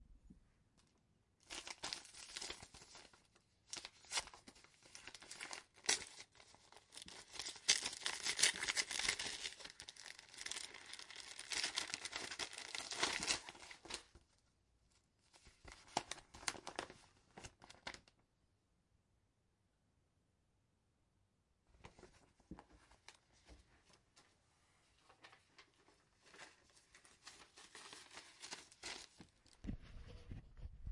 Envelope opened
Someone opening an envelope. Neutral background indoor atmos. Recorded on a Zoom H1.
This is raw and unedited.
Mail, Packaging, Paper